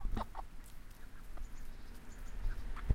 Chicken sounds 2
birds, Chicken, clucking